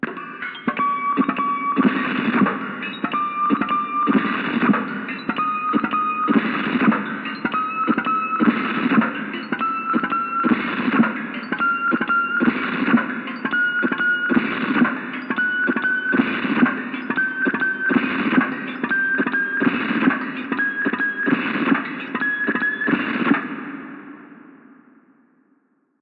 CASIO SA-5 Glitch 6
My beloved Casio SA-5 (R.I.P - burned during duty) after circuit bend. All the sounds in this pack are random noises (Glitches) after touching a certain point on the electrical circuit.
bend, Casio, circuit, circuit-bend, glitch, random, sa-5, synth